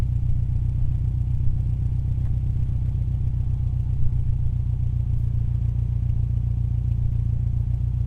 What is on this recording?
Subaru Impreza STI idling